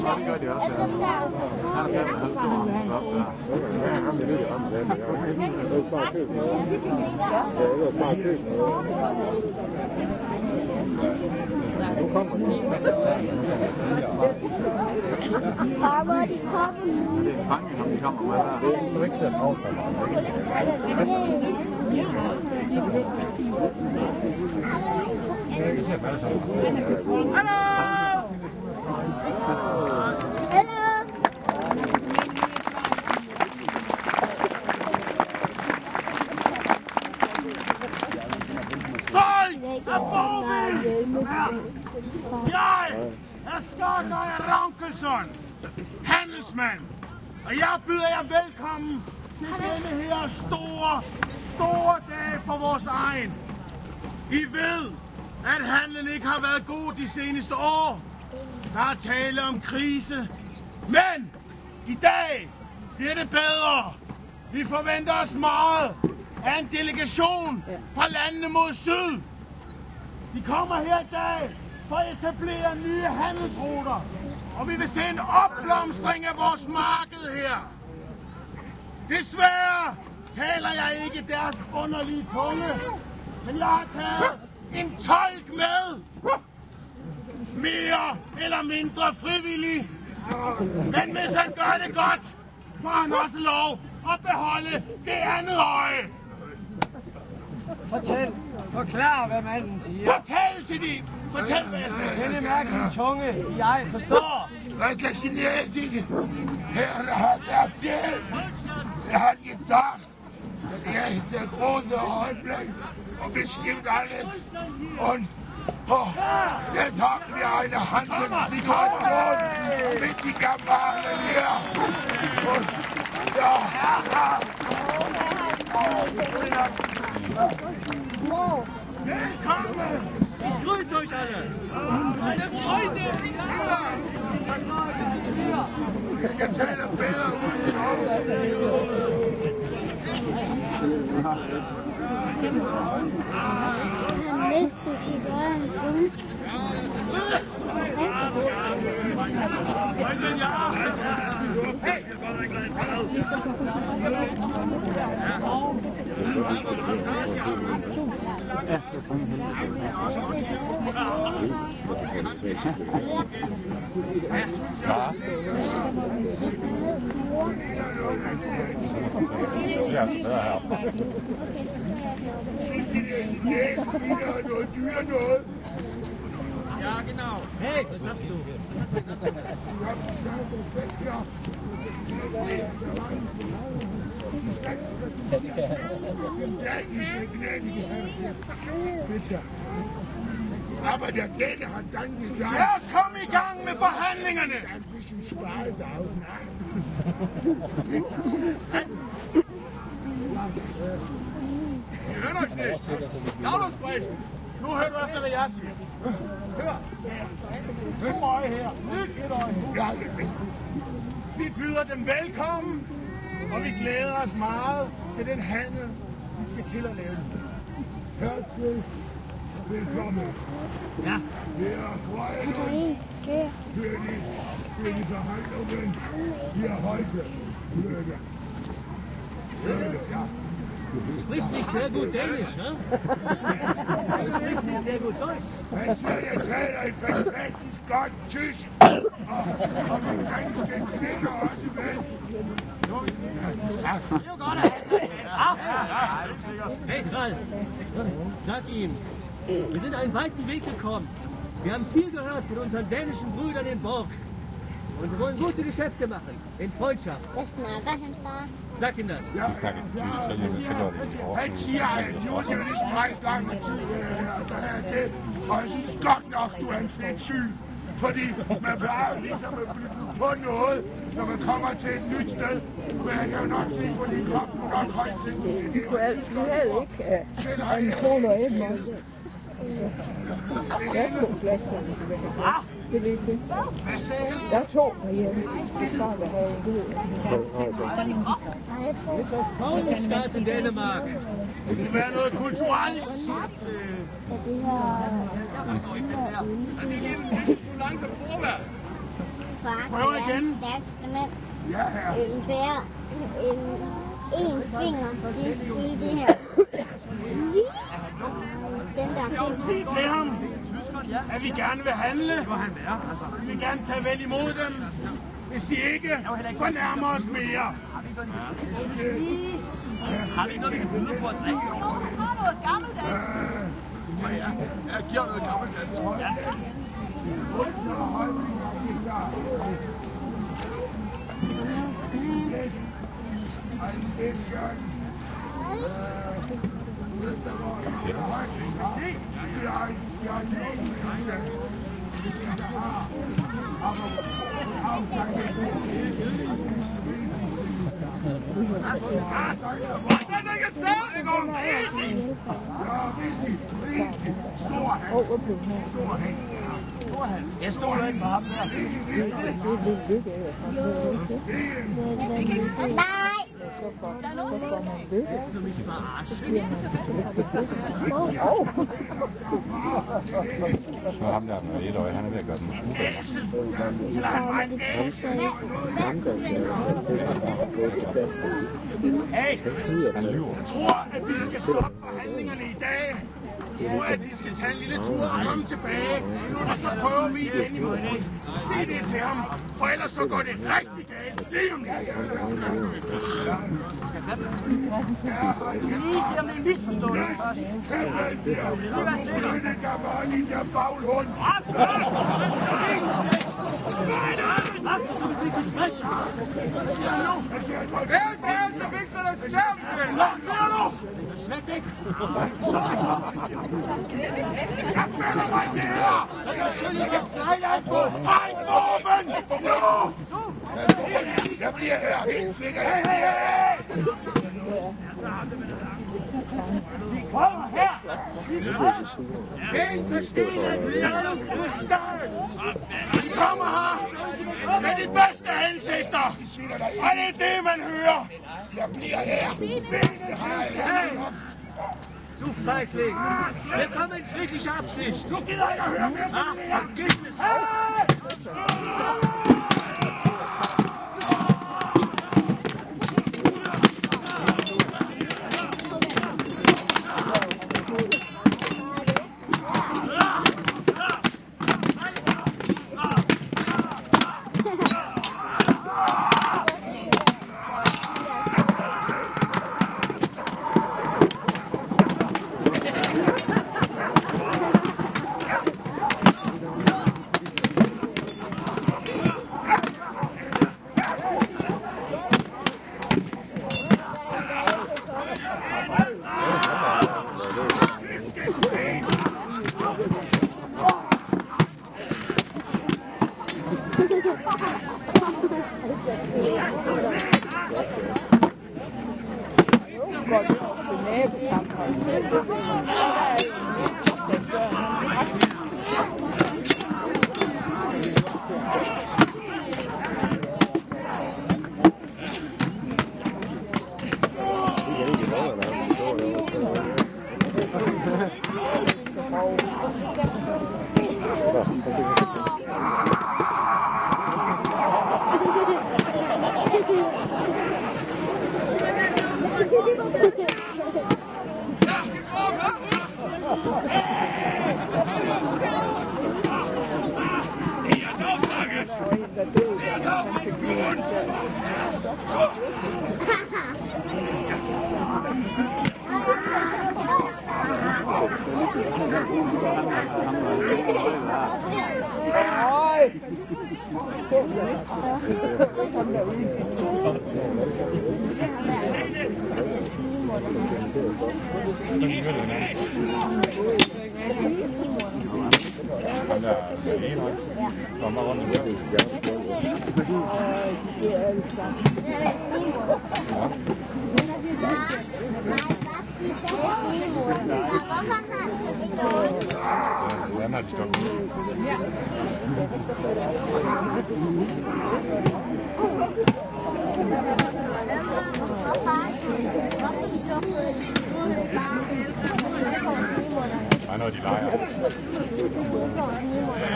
viking battle
In Bork viking market, there was a display, or you might call it a play, about how a battle between viking tribes could look, sound and happen. In this particular play, a thrall betrayed his master on purpose, with bad translation between the danish and german language, causing the two tribes to battle each other instead of doing business.
Recorded with a Sony HI-MD walkman MZ-NH1 minidisc recorder and two WM-61A Panasonic microphones Edited in Audacity
applause, battle, chat, clapping, crowd, danish, fight, german, laughter, noise, people, shield, sword, swords, talk, tourist, tourists, viking, war, yell, yelling